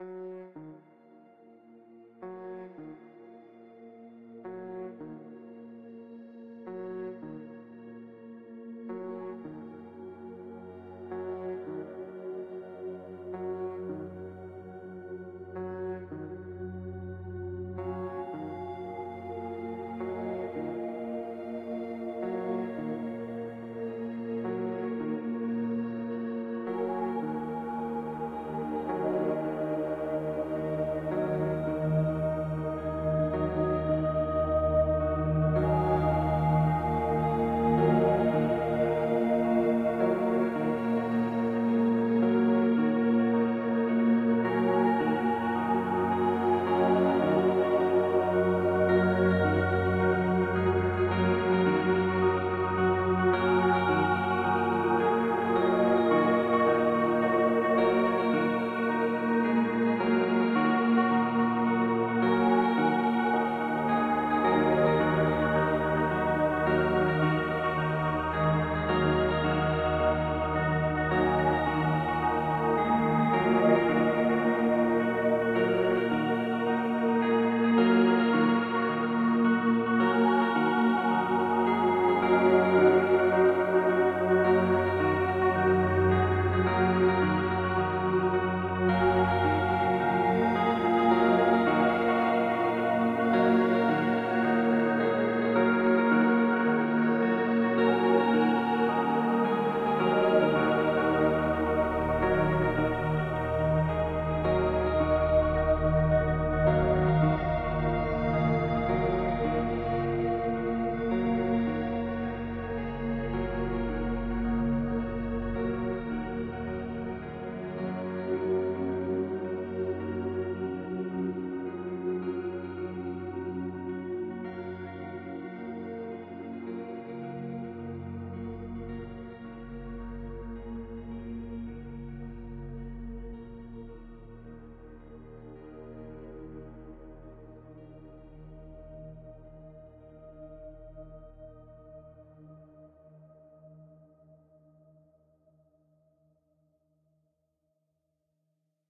Not To Notice
ambience, ambient, atmosphere, atmospheric, calm, chill, deep, drone, electronic, experimental, meditation, melodic, music, pad, relax, soundscape, space